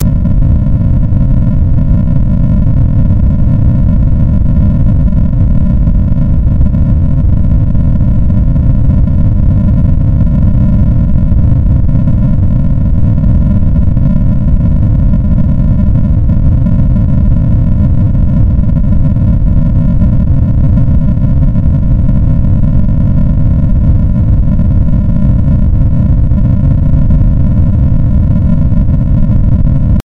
12 LFNoise1 400Hz
This kind of noise generates linearly interpolated random values at a certain frequency. In this example the frequency is 400Hz.The algorithm for this noise was created two years ago by myself in C++, as an imitation of noise generators in SuperCollider 2.
frequency, noise, ramp, linear, low, interpolation